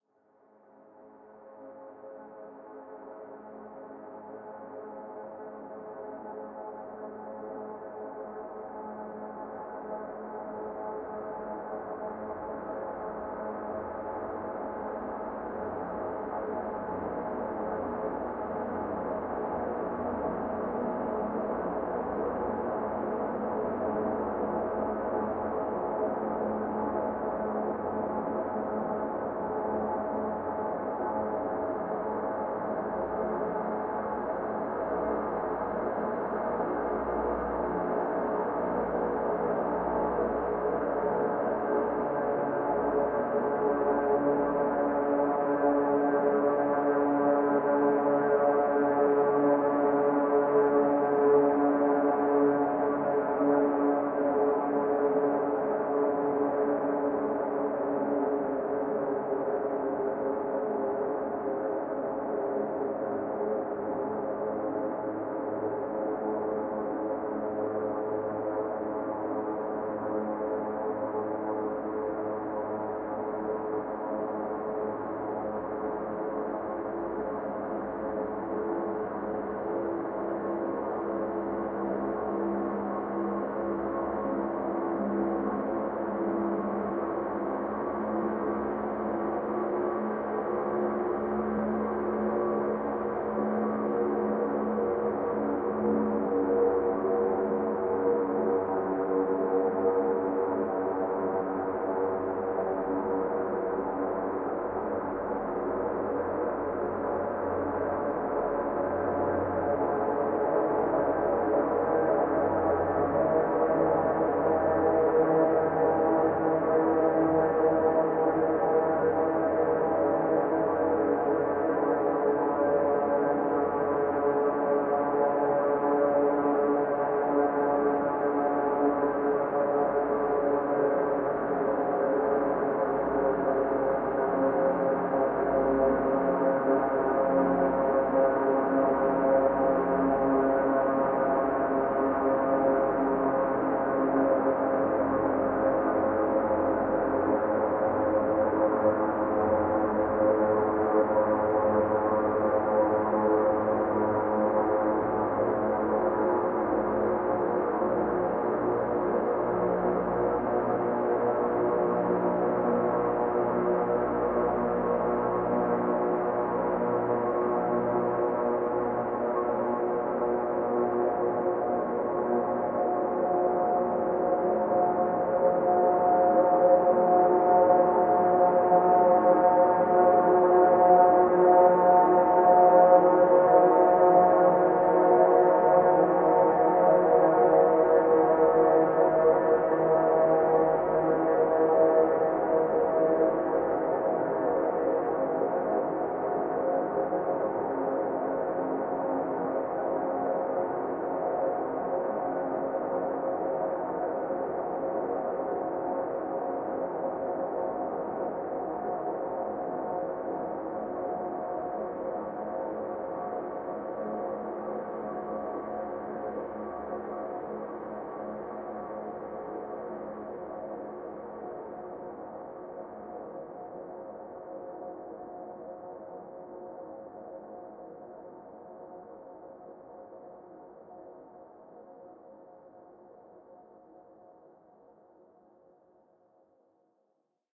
Dark Ambient 017
atmosphere, soundscape, ambience, dark, ambient, background, atmos, background-sound